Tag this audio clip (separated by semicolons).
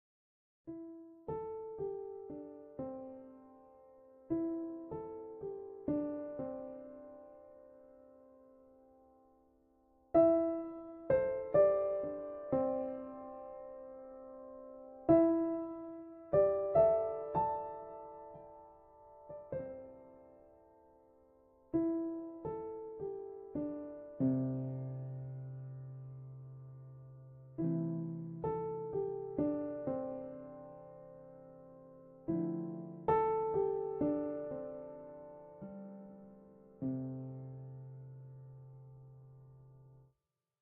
improvised; ambient; piano; melody; free; music